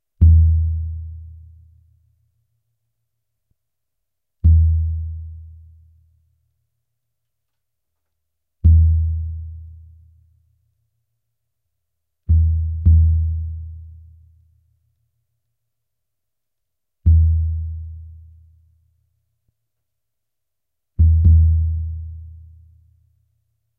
a Brazilian bass drum played with a mallet, this one tuned low and playing a slow pattern at 114 bpm
bass, deep, drum, kick, low, mallet, resonant, zaboomba